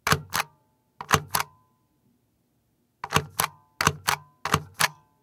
es-stamp
self inking stamp